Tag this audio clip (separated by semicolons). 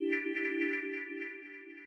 alert; call; message; phone; ringtone; text; tone